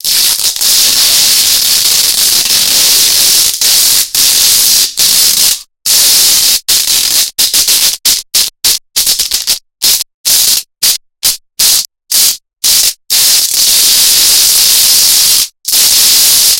Electricity Energy 1
[Warning: LOUD SOUND! Lower the volume if too loud!]
Need some powerful burst of energy that zaps out of control? This electrifying sound effect can shock all senses of audible for any media scenarios!
(Recorded with Zoom H1, Mixed in Cakewalk by Bandlab)
Electric, Zap